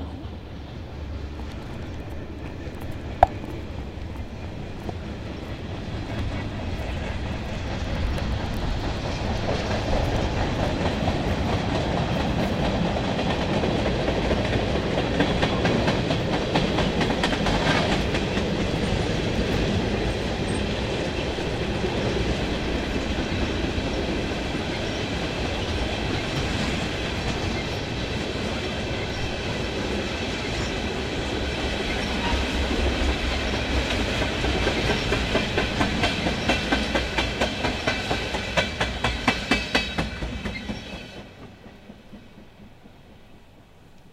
Moving Train
a train passed during one of my movie shoots and decided to record it. This file is untouched so there is some mic noises in the beginning.